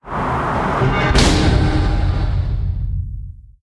BIG STRUCTURE FALLING IMPACT STOMP
big-structure, enormous, falling, gigantic, huge, impact, stomp